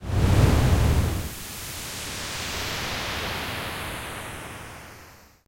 By request. A whoosh. 5 in a series of 7 - short build a long tail, large sounding (I don't know what happened to the file called whoosh05)I took a steady filtered noise waveform (about 15 seconds long), then added a chorus effect (Chorus size 2, Dry and Chorus output - max. Feedback 0%, Delay .1 ms, .1Hz modulation rate, 100% modulation depth).That created a sound, not unlike waves hitting the seashore.I selected a few parts of it and added some various percussive envelopes... punched up the bass and did some other minor tweaks on each.Soundforge 8.
electronic, whoosh